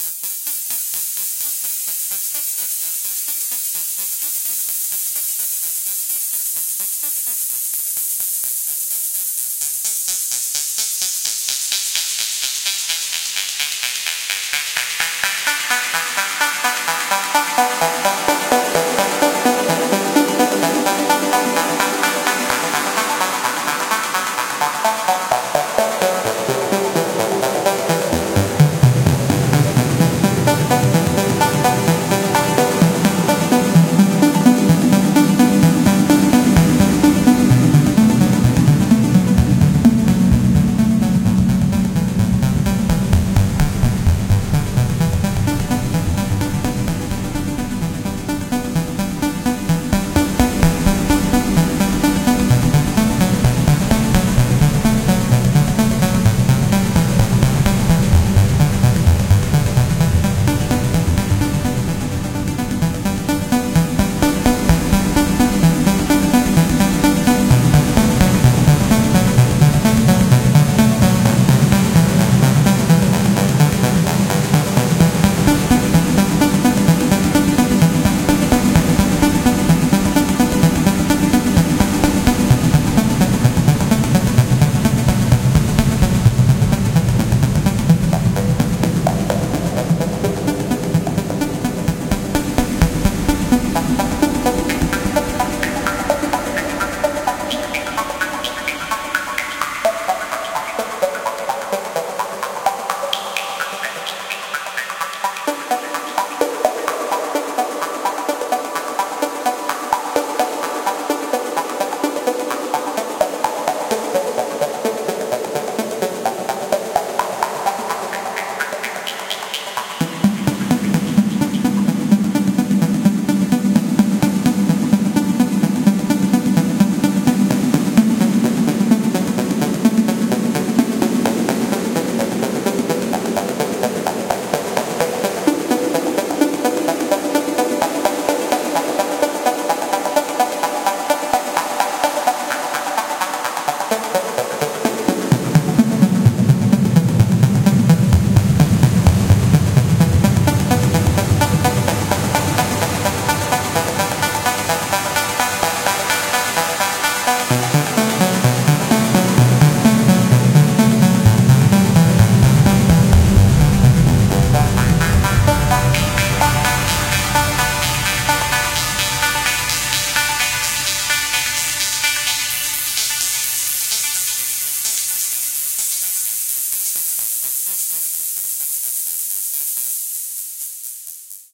This loop recorded from Roland SH-201 synth at 10.11.2016